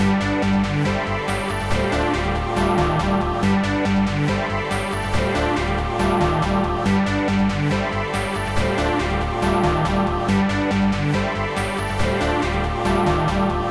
Searching MF
Another melodic dance loop EDM
synth, Dance, melody, Melodic